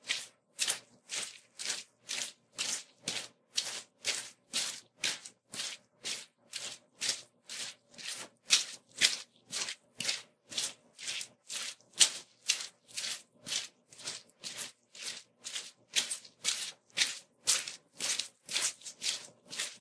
Walking around in squishy shoes
Like your shoes got all sogged up in the rain, and you're walking around inside wearing them. Or, like you're jumping up and down on top of sponges; I won't begrudge you if you want to use it for that.
feet, footsteps, socks, soggy, walk, walking